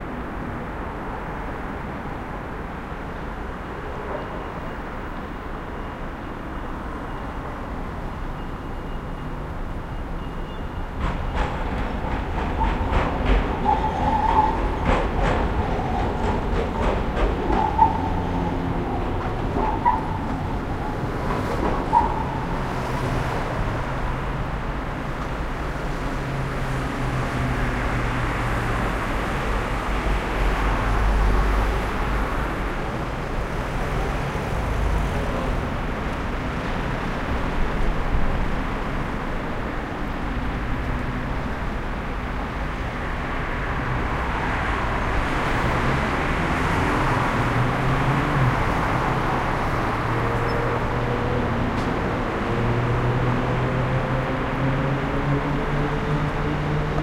City Trafic Tram Cars Rotterdam
Outdoor city traffic.
Rotterdam, The-Netherlands, Transport, Cars, Tram